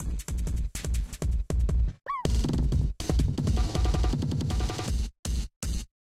Drumloops and Noise Candy. For the Nose
rythms, drums, processed, experimental, extreme, sliced